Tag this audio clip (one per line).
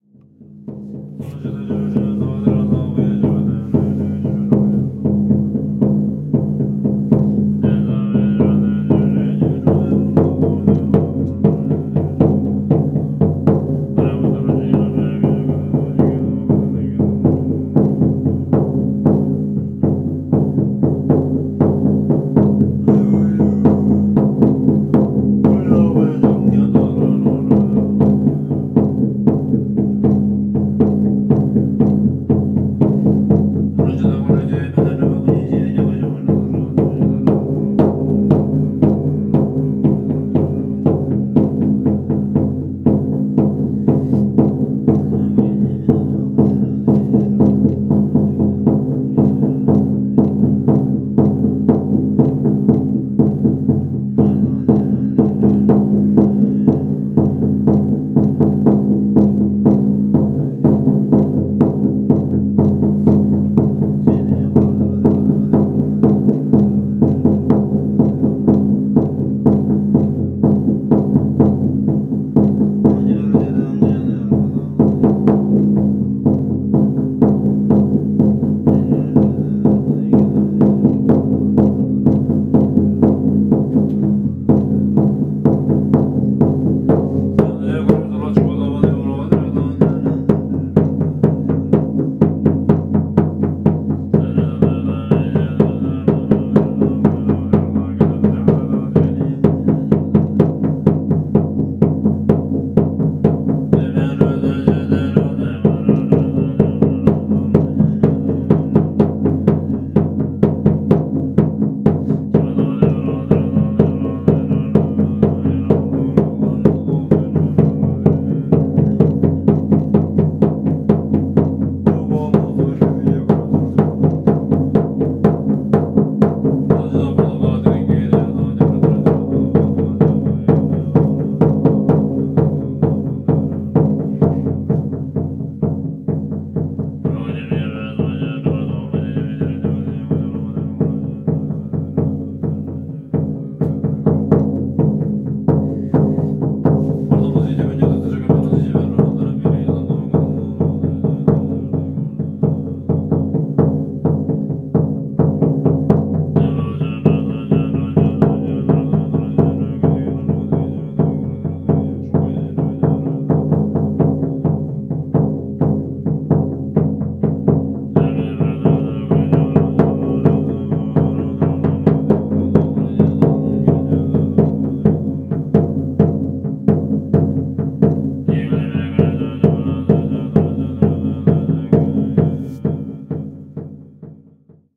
royal-place
india
ladakh
gompa
field-recording
monk
stok
sutra
drum